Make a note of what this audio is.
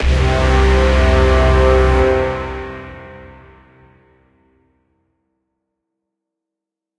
braaaam8 push tg resonance
A collection of "BRAAAMs" I made the other day. No samples at all were used, it's all NI Kontakt stock Brass / NI Massive / Sonivox Orchestral Companion Strings stacked and run through various plugins. Most of the BRAAAMs are simply C notes (plus octaves).
battle, braaam, brass, cinematic, dramatic, epic, fanfare, film, heroic, hit, hollywood, movie, mysterious, orchestral, rap, scifi, soundtrack, strings, suspense, tension, trailer